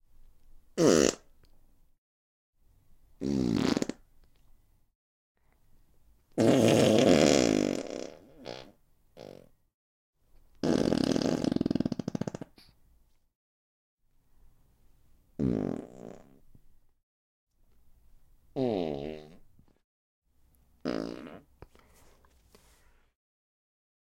Farts, loud and flabby x7
Recorded on Zoom H4n.
7 comically loud and flabby fart noises suitable for scatological humour.
comedy, crap, fart, farts, flabby, funny, humor, humour, loud, poo, poop, scat, scatological, shit, trump